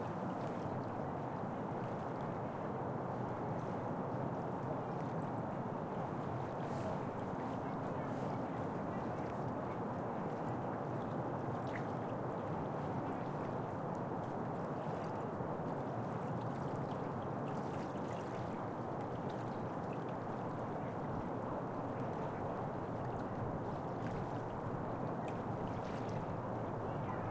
Night-time wide angle stereo recording of Manhattan, as heard from across the East River, the recorder was kindly allowed access to the veranda of the Riverview Restaurant & Lounge in Long Island City and is facing the Manhattan skyline. Some noises of the restaurant can be heard in the background, very subdued, the quiet lapping of the East River can be heard in the foreground, and Manhattan bustle and traffic on the FDR Drive is in the wide range.
Recorded in March 2012 with a Zoom H2, mics set to 90° dispersion.
ambient, riverside, wide-angle